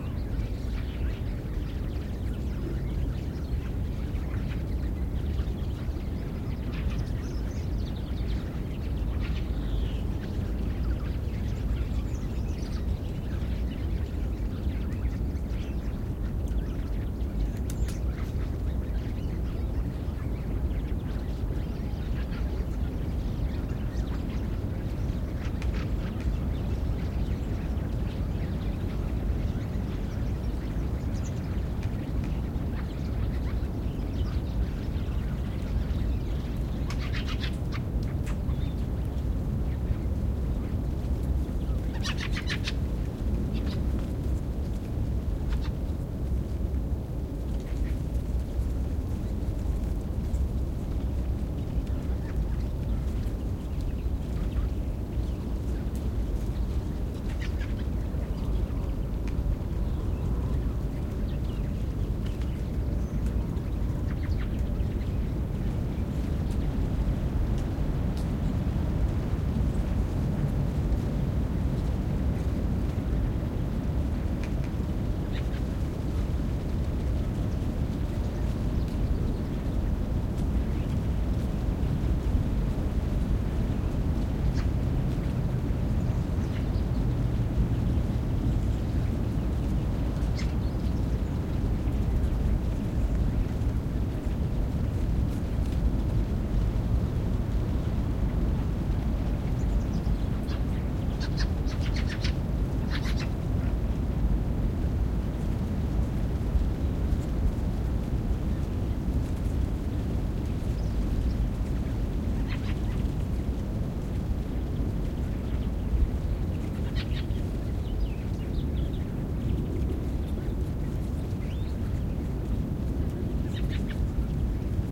Recording of a flock of fieldfares chattering in a tree. Recorded in otherwise open grassland with the distant noises from a city. MKH60 microphones into Oade FR2-le recorder.
birds, field-recording, birdsong, chatter, fieldfares, fieldfare